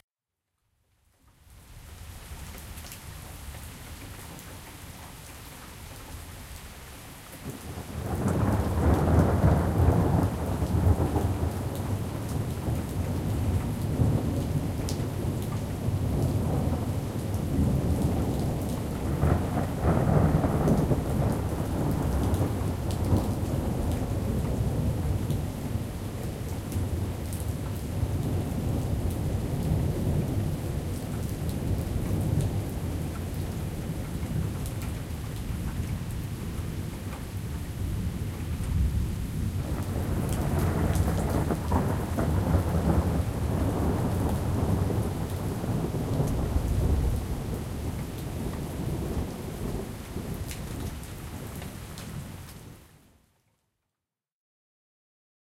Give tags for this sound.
Afternoon Lightning Rain Storm Thunder Thunderstorm Weather

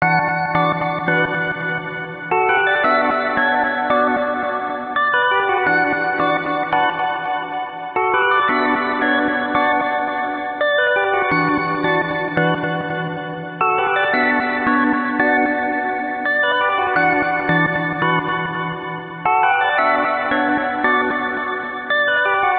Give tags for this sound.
170bpm ambient melody synth

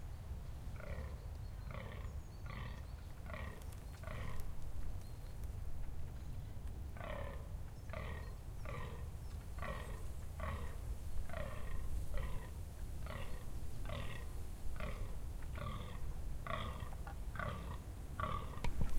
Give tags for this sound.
ambience ambient birds bulderen bulderende conifers crow deer duin duinen dune hert herten kraai male mating naaldbomen roaring vogels wind

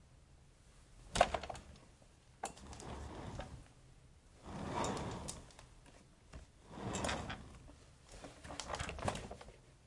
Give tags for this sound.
Chair CZ Czech Swivel

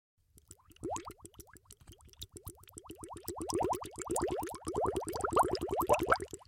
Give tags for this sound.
potion water bubble bubbling

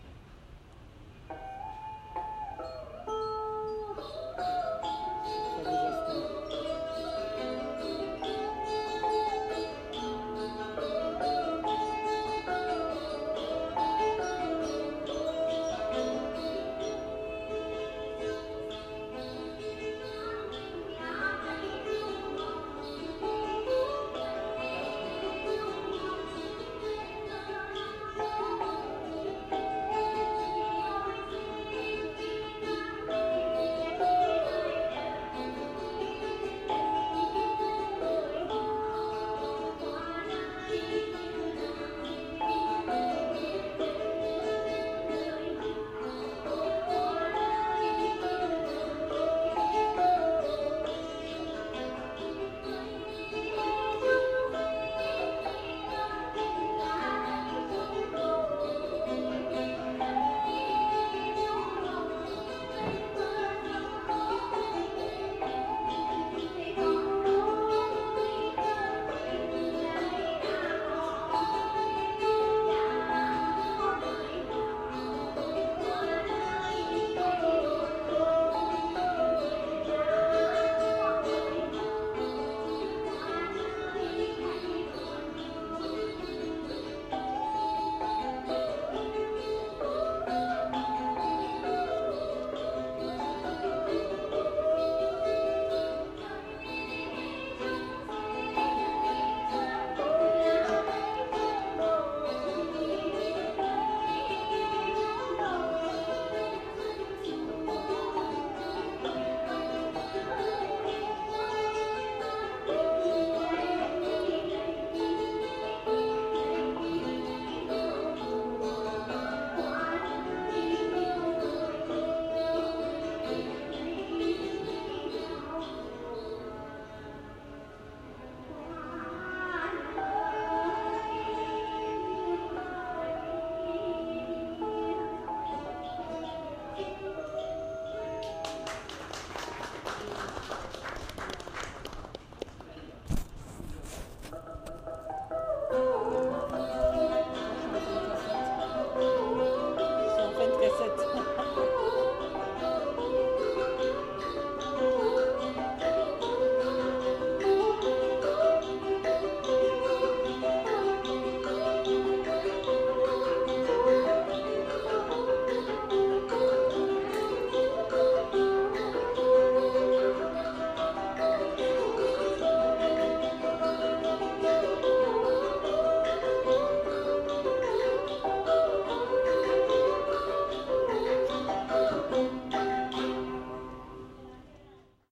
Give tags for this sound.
ambience culture Hanoi instruments music singer song traditional Vietnam Vietnamese voice

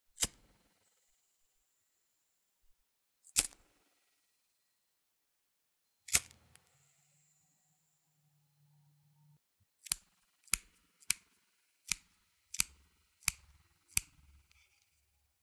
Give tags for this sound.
cigarette foley lighter

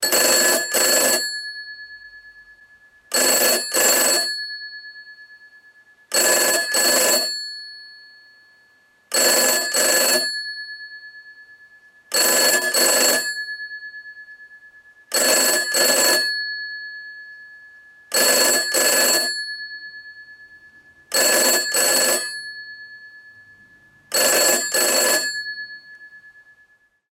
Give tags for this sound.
old ringing ring incoming rotary 746 gpo telephone call

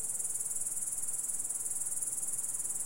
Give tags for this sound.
Nature naturesound Grasshopper nature-ambience nature-sound naturesounds background-sound

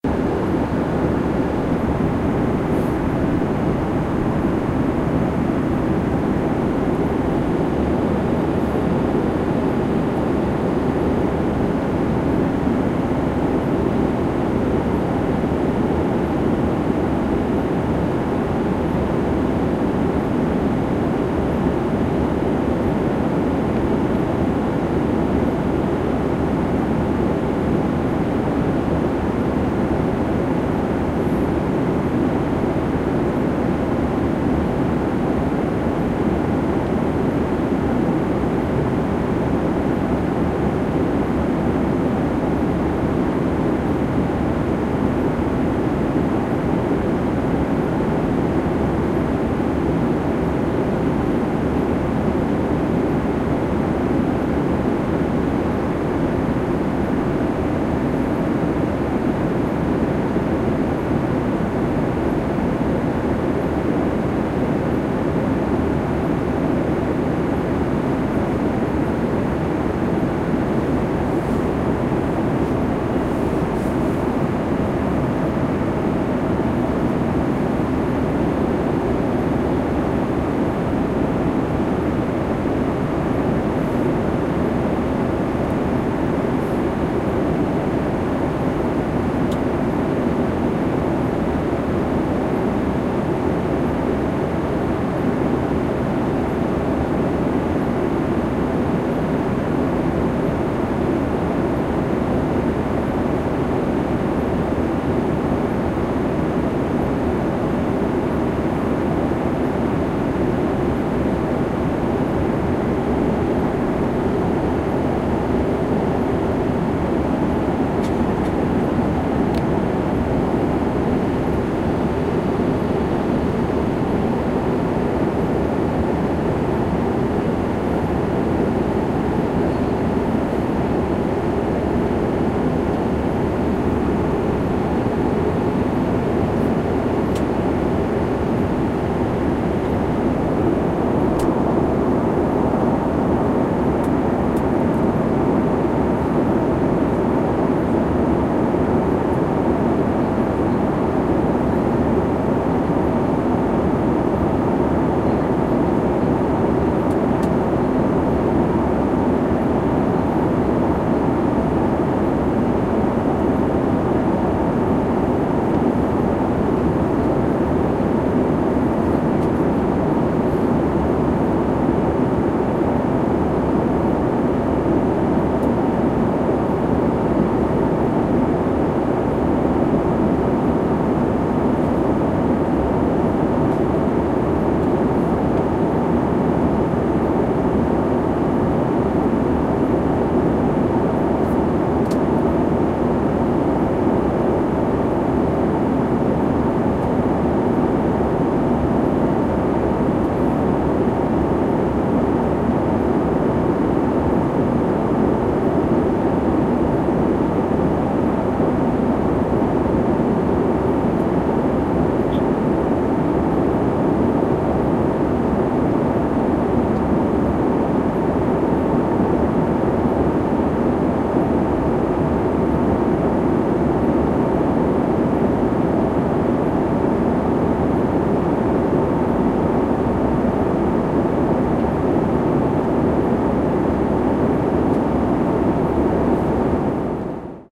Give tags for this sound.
737 air-plane airplane air-travel ambiance ambience ambient atmo atmos atmosphere atmospheric background background-ambience background-noise background-sound bancground-ambiance engine engine-roar field-recording flight general-noise in-flight jet noise plane roar soundscape travel vacation white-noise

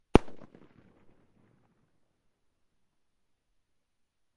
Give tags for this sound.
Bang Boom Explosion Firecrackers Fireworks Loud

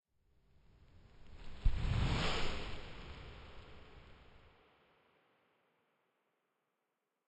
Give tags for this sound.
tv vsi field-recording movement alex

